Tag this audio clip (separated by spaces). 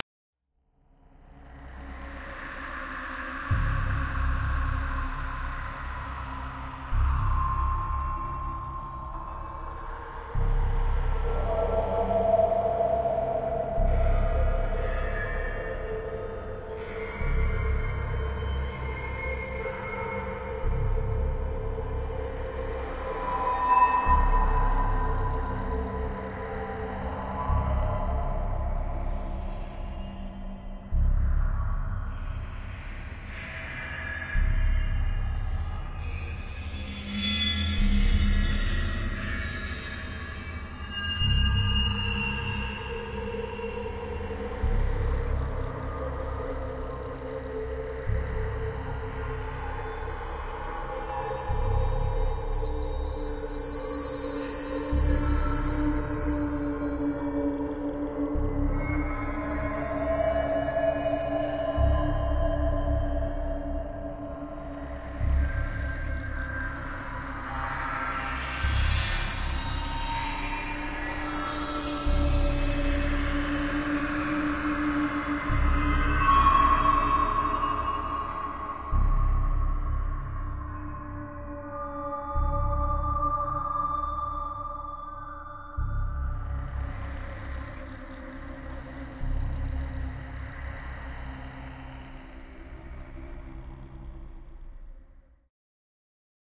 Halloween ghost music